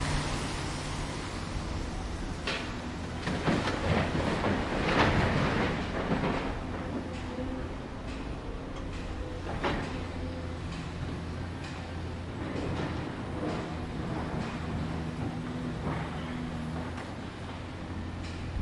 Construction Site Cat 2
Sounds from the construction site.